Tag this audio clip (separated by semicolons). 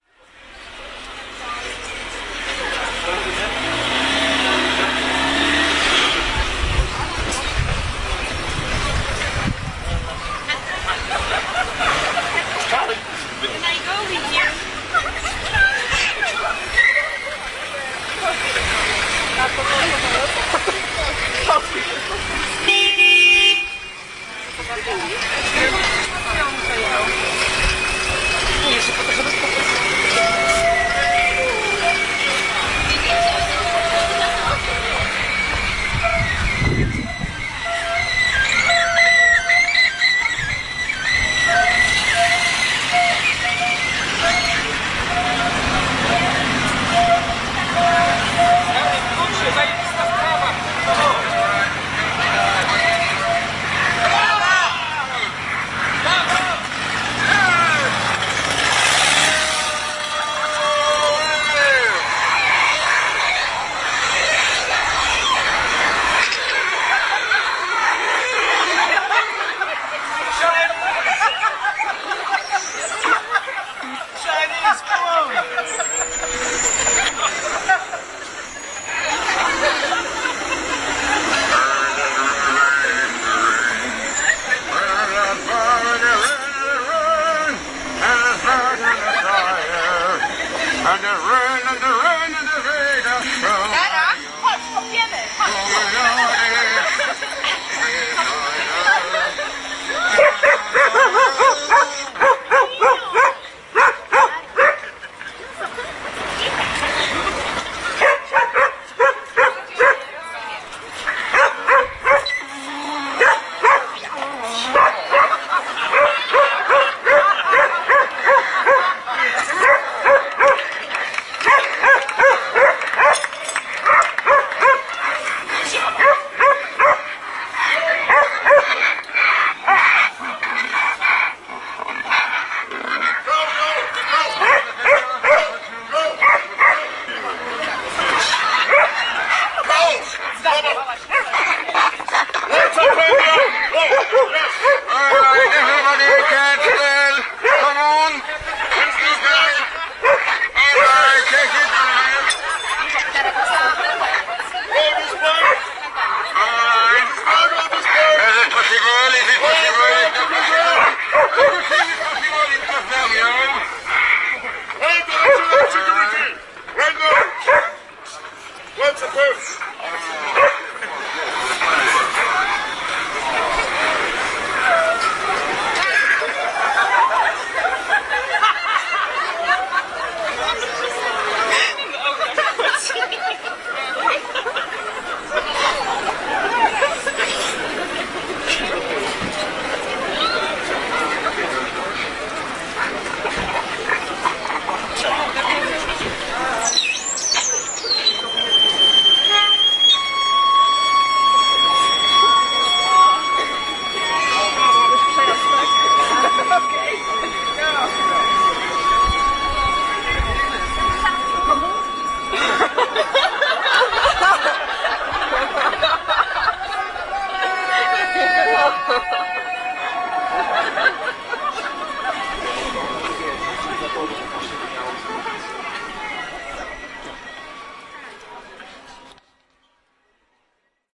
poznan; crowd; jeanne; poland; festival; theatre; simone; malta; street; performance